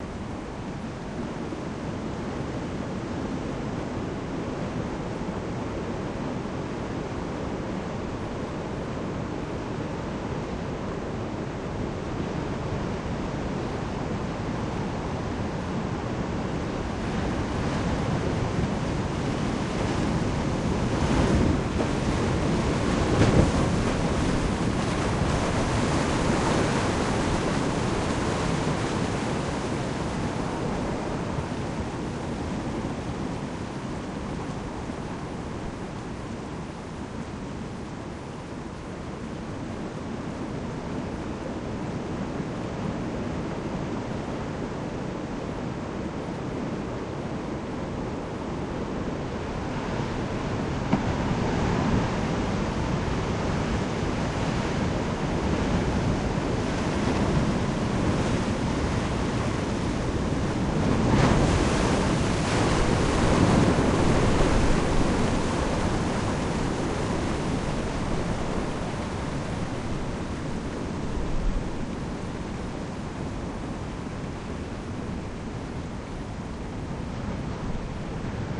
Some sea-sounds I recorded for a surfmovie. Recorded in Morocco